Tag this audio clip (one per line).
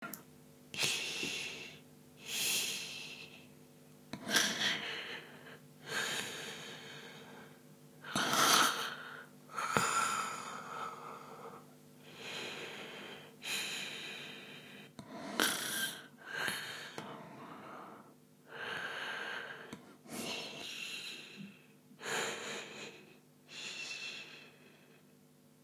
bedroom
night
sleep
snore